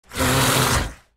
A monster voice
creature, beasts, growls, processed, monster, beast, scary